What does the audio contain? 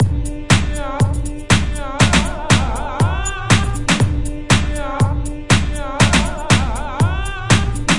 Processed acid-loop 120 bpm with drums and human voice